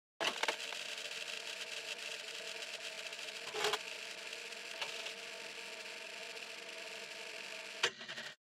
Old School Projector
film, Old, reeling, speed, rusty, Old-Projector, Projector, Old-School, film-reel